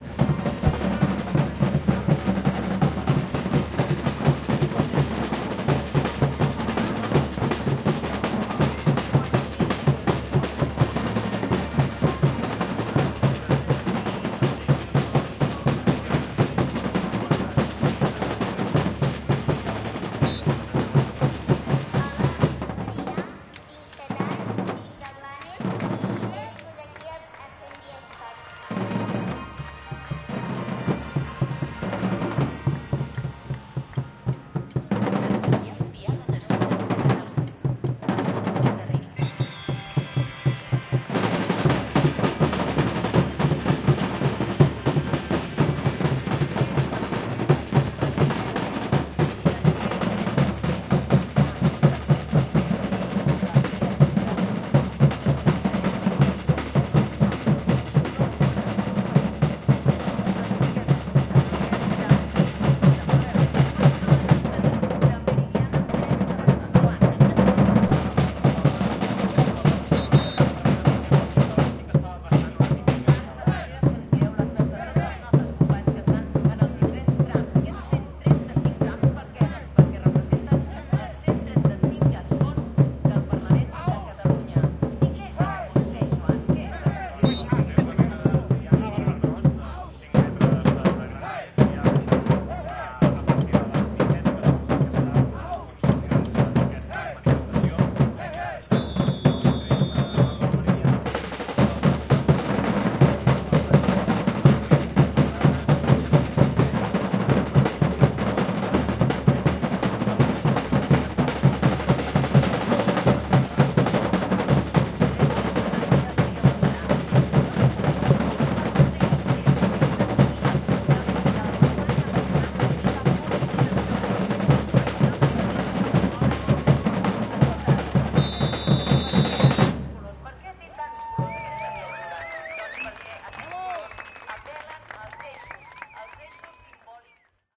batucada far away distance barcelona
11 setembre background crowd people and radio talking in catalan
atmospheric, barcelona, background-sound, background, ambience, ambient, general-noise, batucada, away, distance, far, distant